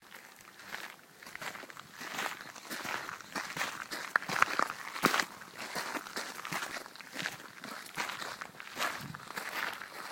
Two people walking on a gravel path.
Recorded at Wallington (National Trust property), near Rothbury, Northumberland, UK, on iPhone as video.